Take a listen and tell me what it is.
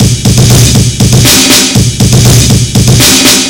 InduMetal Drums001 hearted
drums, loop, loud